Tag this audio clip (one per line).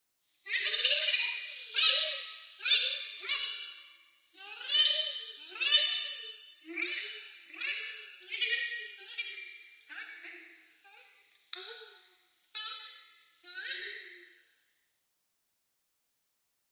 Bird
Silly
Crazy
Sounds